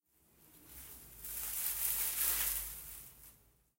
foley for my final assignment, a bush moving
foliage, bush, leaf, foley